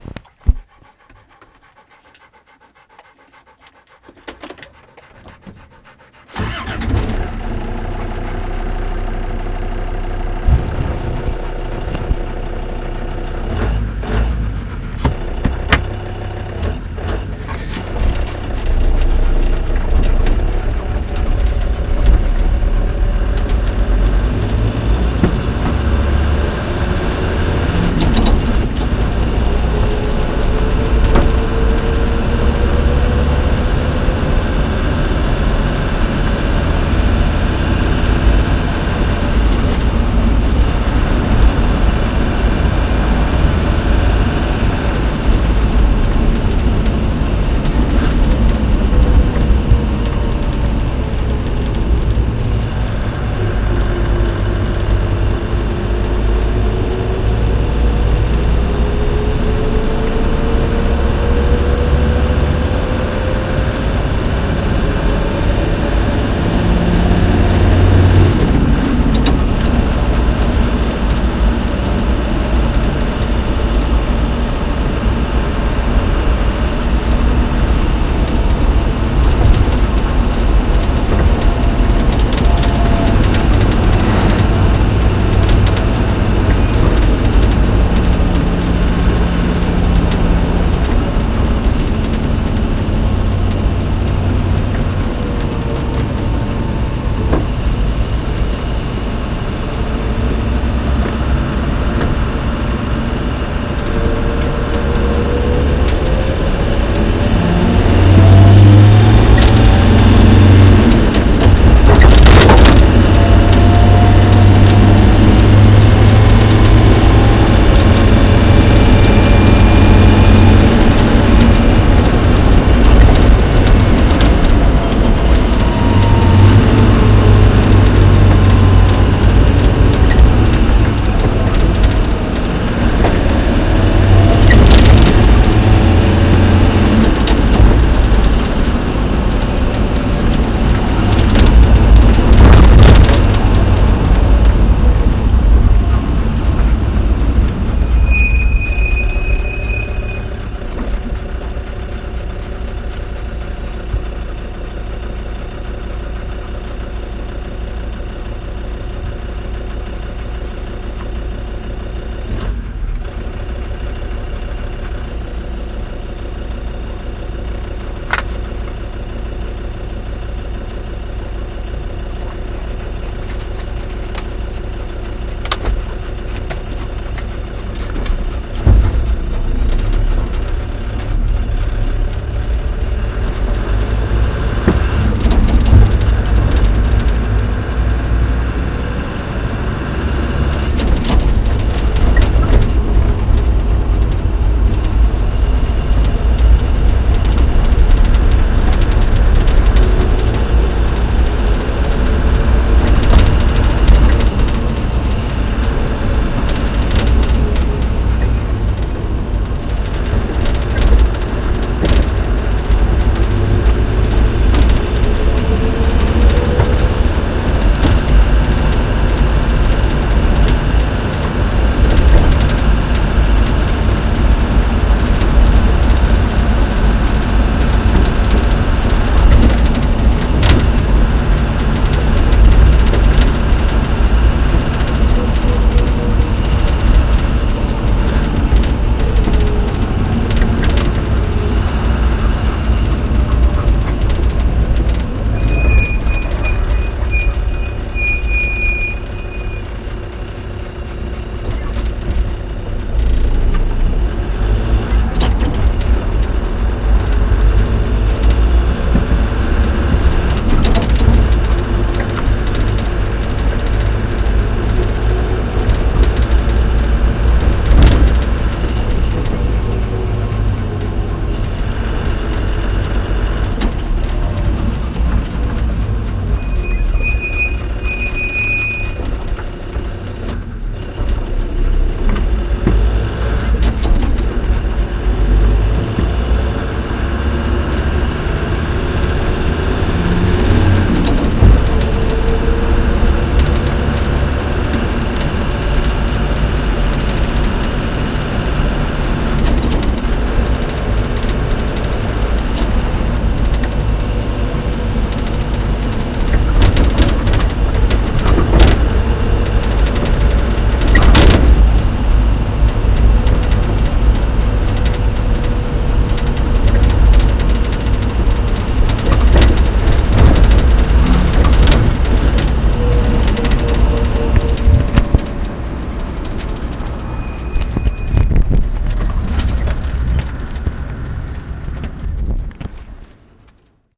Two dogs, a human, a Land Rover Defender and the road.